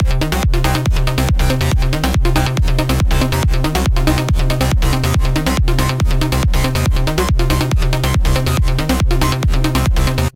Arcade War
Battle, Boss, Combat, Cool, Enemy, Hard, Space, War, Weapon